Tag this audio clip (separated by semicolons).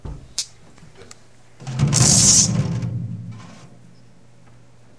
bouncing; maganent-noises; percussion